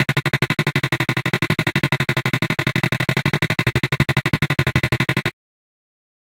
16 ca dnb layers
These are 175 bpm synth layers maybe background music they will fit nice in a drum and bass track or as leads etc